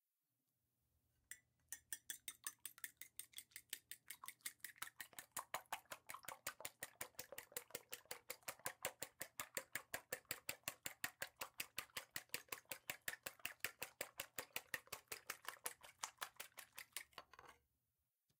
Huevos Bate
crujir, Restrillar, romper